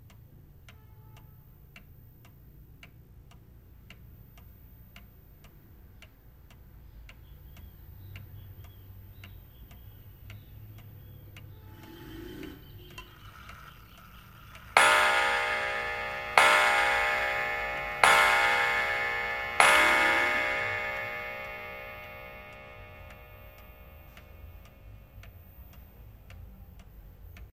relogio de corda 4 batidas2
relógio de corda batendo 4 vezes